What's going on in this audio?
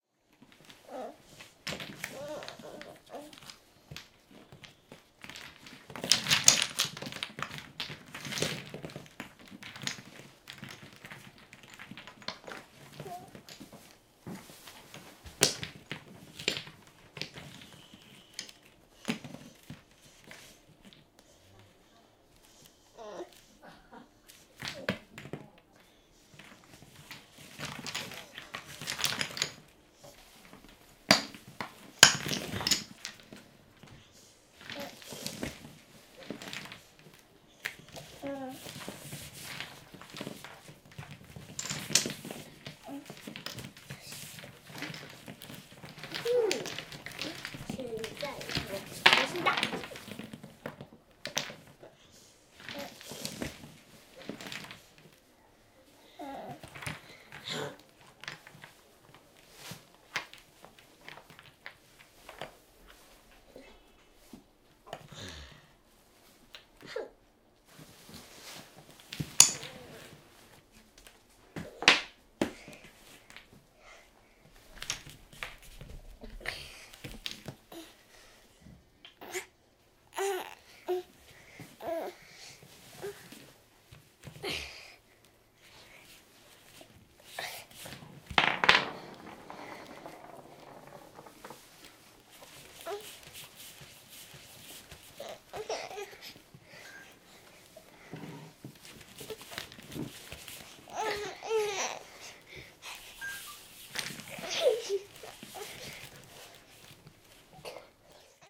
Toy-Wooden-Blocks Child
Wooden-Toy-Blocks, sfx, Child